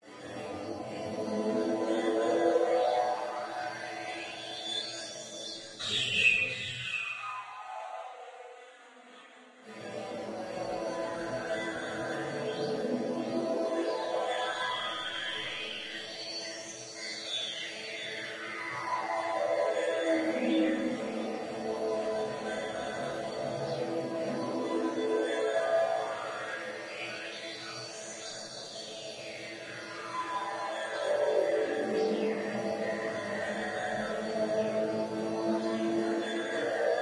Sunny Cities and who they remind me off that I have been too in the past 5 years. Ambient Backgrounds and Processed to a T.
distorted, tmosphere, paste, processed, ambient, valves, pads, clip, glitch, backgrounds, saturated, atmospheres, heavily, rework, cuts, copy, soundscapes